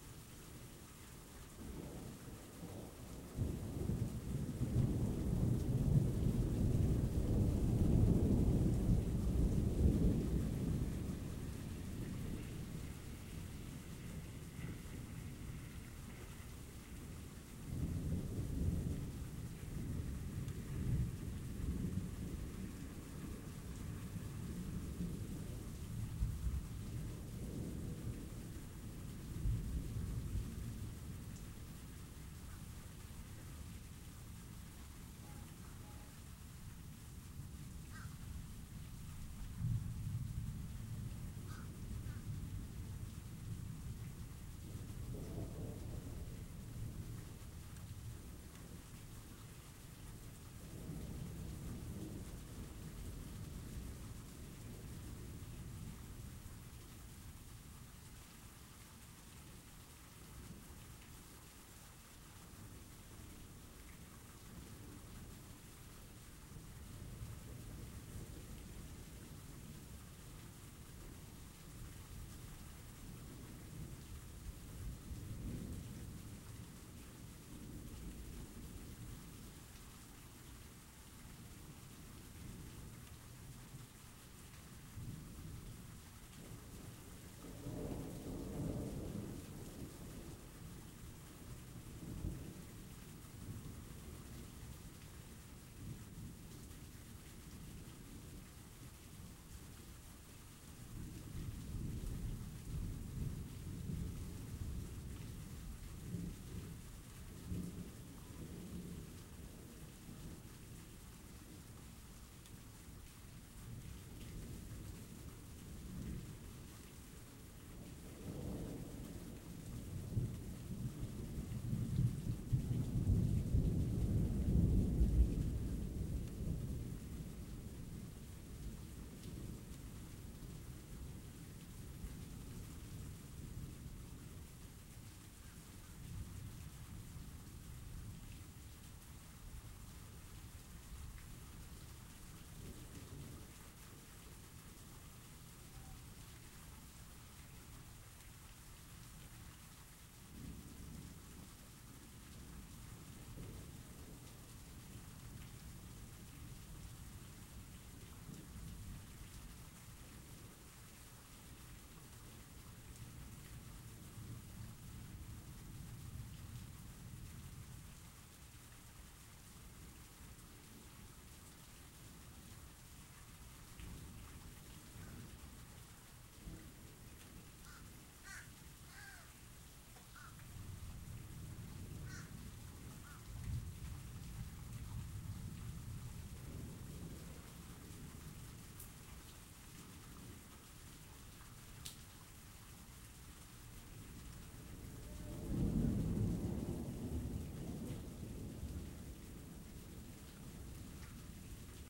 More much needed thunderstorms recorded with my laptop and a USB microphone.